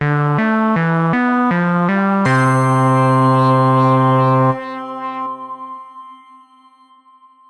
Let's Learn - Logotone
Short, resonant logotone or ident sound. Sounds like something that might appear along with a video bumper before an early 80's PBS program.
synth, synthesizer, resonance, ident, vintage, retro, logotone, analog, 70s